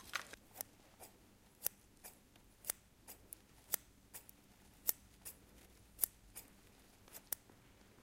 A small pair of scissors freely moved
scissors, environmental-sounds-research